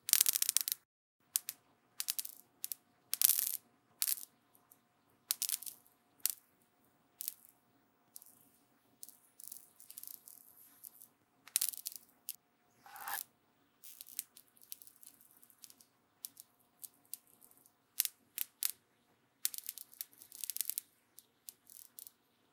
CRT Screen Static Sparks
Me rubbing my hand on a medium-sized, powered-on CRT television, producing static-electricity sparks.
Noise-reduced. Trimmed to remove dead air and unusable audio. Notch filtered at 15660Hz to remove the CRT whine, then high-pass filtered (48dB) at 100Hz to remove handling noise (additional HPF'ing may be possible, depending on which part you end up using).
Recorded with handheld ZOOM H1 several inches/centimeters away from the screen.
electric, electrical, electricity, quiet, small, spark, sparks